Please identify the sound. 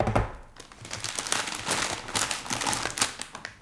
various noises taken while having fun with balloons.
recorded with a sony MD, then re-recorded on my comp using ableton live and a m-audio usb quattro soundcard. then sliced in audacity.
balloon, air, field-recording, indoor, fun, inflate, noise